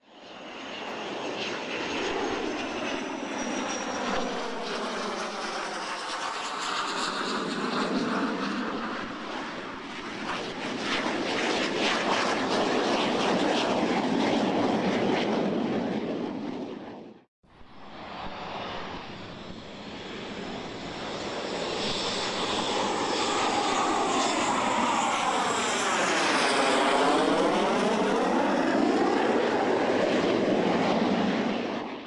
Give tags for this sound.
UCAS military land start army starting aircraft plane x-47b airport

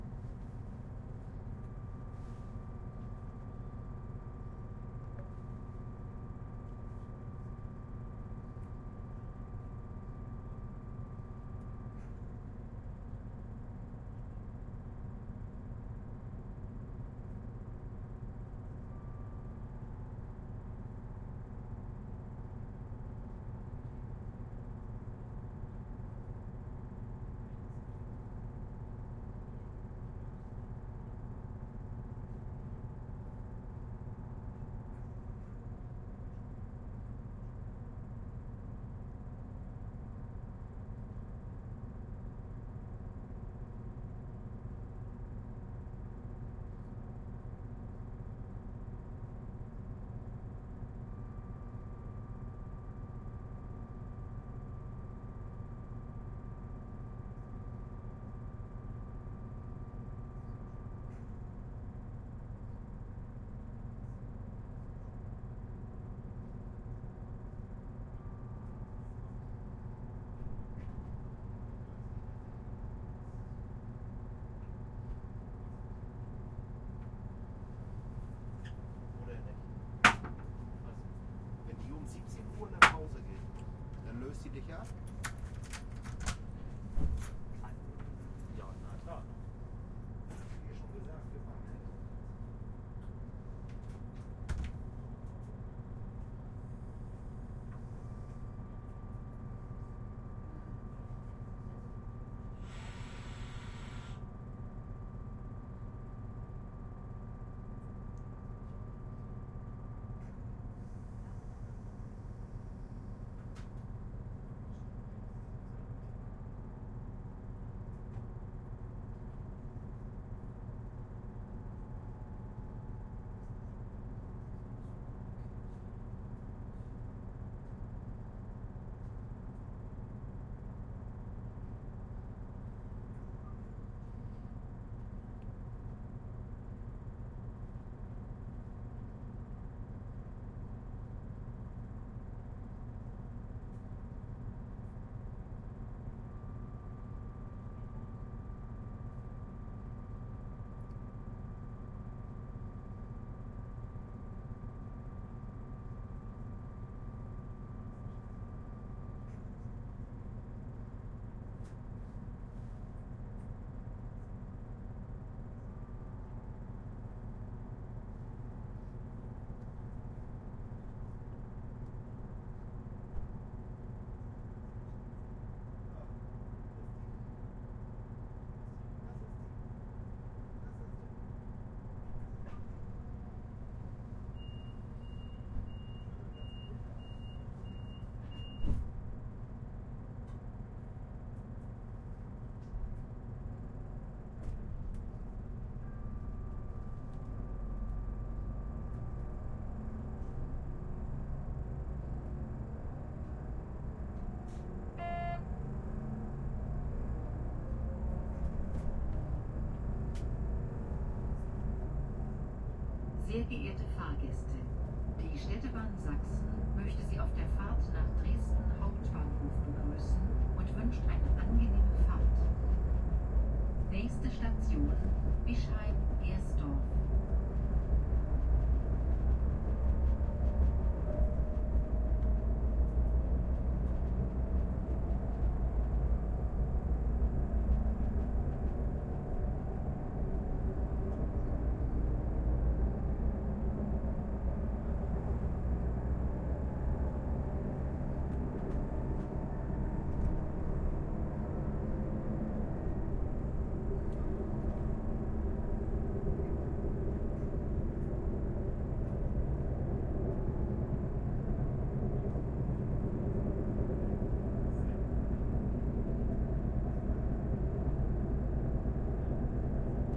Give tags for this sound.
field-recording railway train trains travel

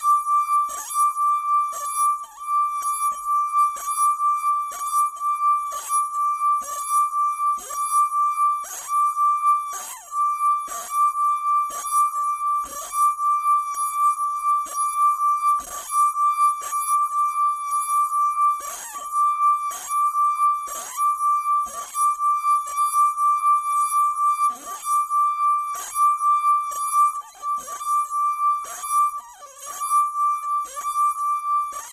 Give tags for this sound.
texture clean sustained melodic tone instrument loop tuned water pressed pressure hard wine-glass noisy drone note glass

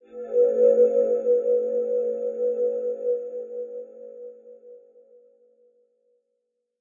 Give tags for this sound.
chimes mysterious mystery wowanstupidowl

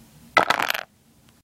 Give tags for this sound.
block crash drop hit impact wood wooden

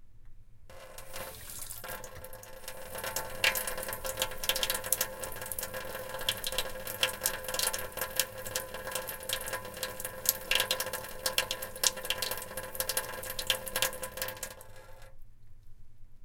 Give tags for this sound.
sink tap Water

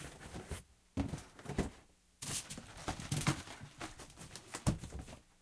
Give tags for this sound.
rummage random clatter objects rumble

